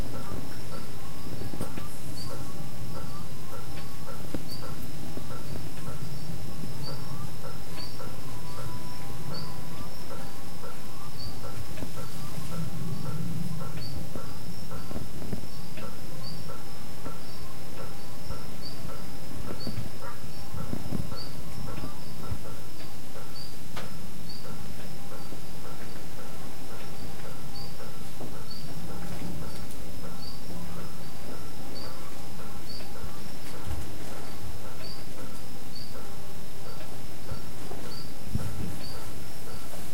Recording taken in November 2011, in a inn in Ilha Grande, Rio de Janeiro, Brazil. Sounds of the night, recorded from the window of the room where I stayed. Crickets far away and other sounds that I couldn't identify. Recorded with a Zoom H4n portable recorder.